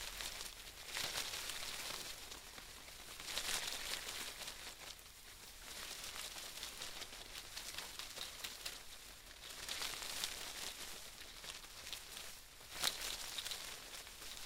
leaf rustles quick from animals running 1
Foley SFX produced by my me and the other members of my foley class for the jungle car chase segment of the fourth Indiana Jones film.
animals, leaf, quick, running, rustle